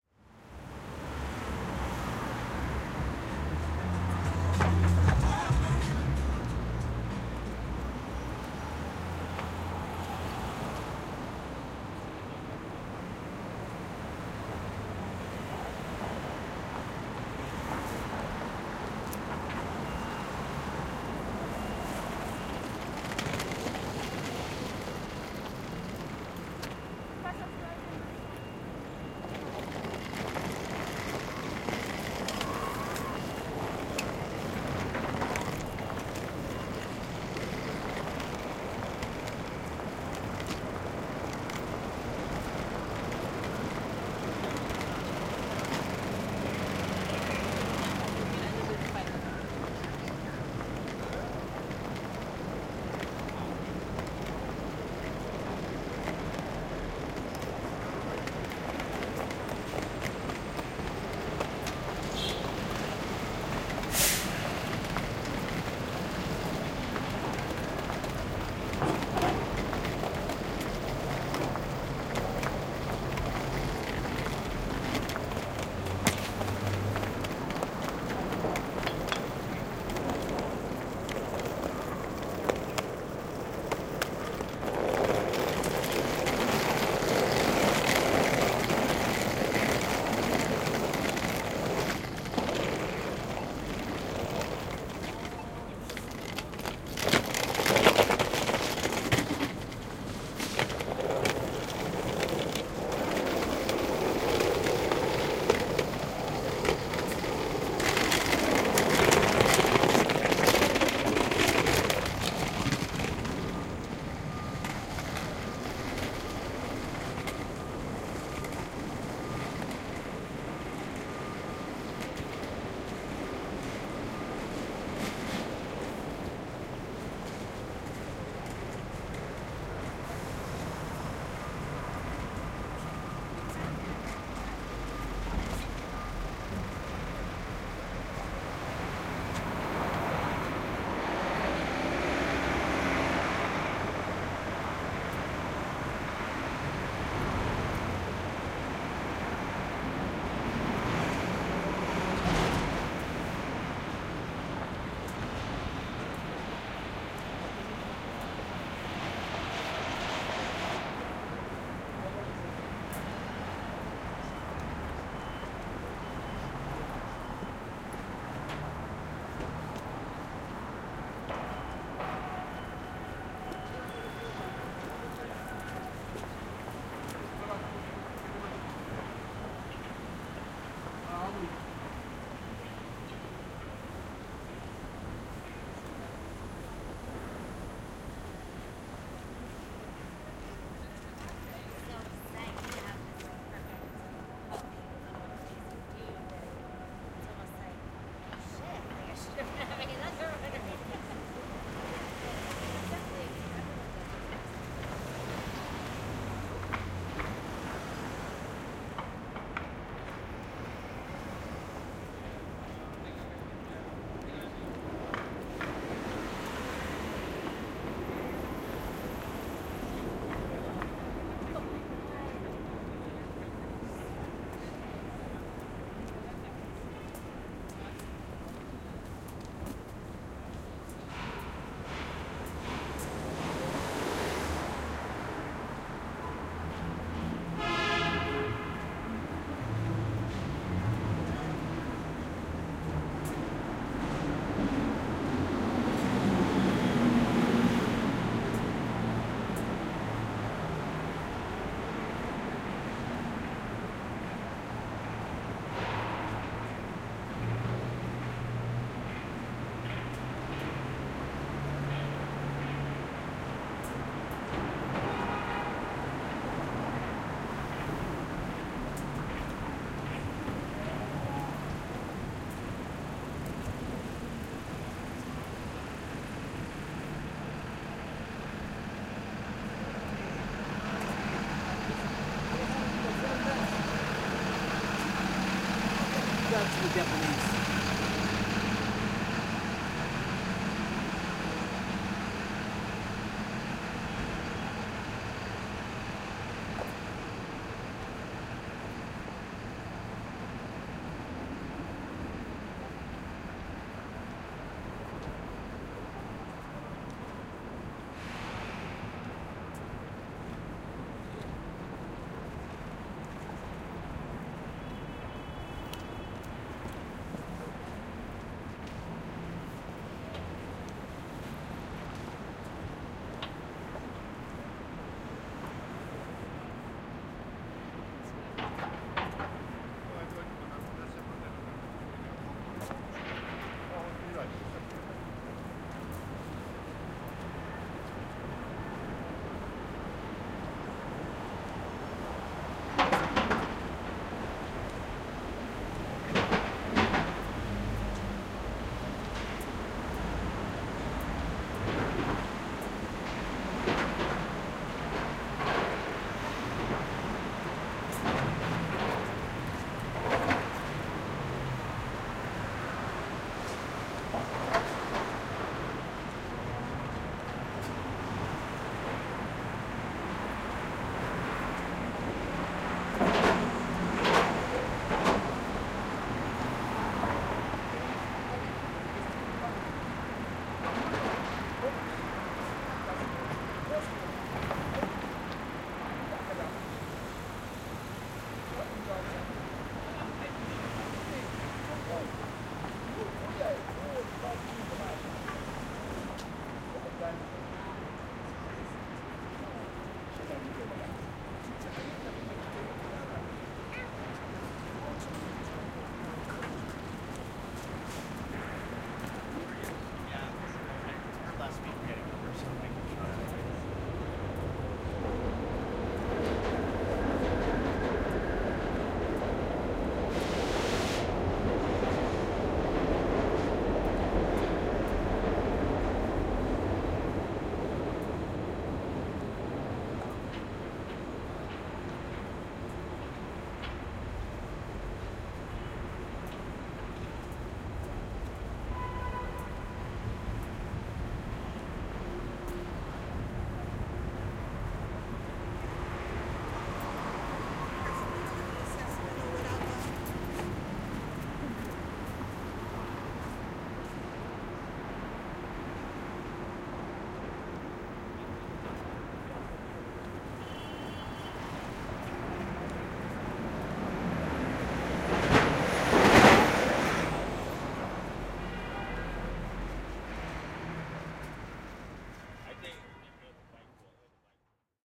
Walking in New York City (Lexington Ave)
Walking down Lexington Avenue, NYC. Sound of traffic, sirens, people walking and pushing suitcases.
Avenue, Cars, City, Field-recording, Lexington, Manhattan, New, NYC, Sirens, Street, suitcases, Traffic, Urban, York